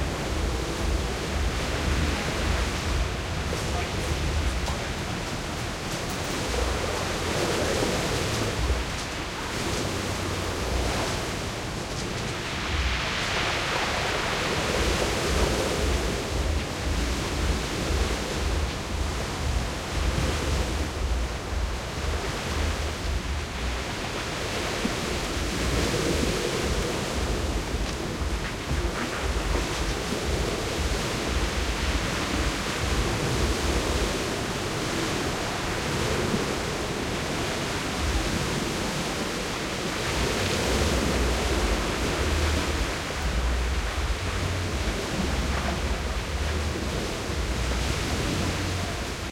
wind heavy tropical storm trees blowing close harsh +steps
heavy, storm, trees, tropical, wind